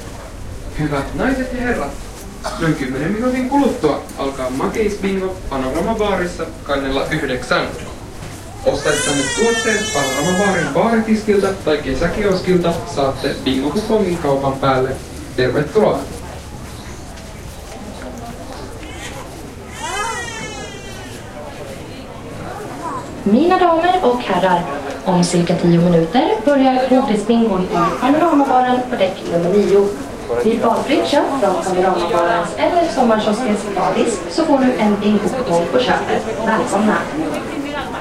20100804.ferry.interior
ambiance in ferry, with people talking and PA system. Olympus LS10 recorder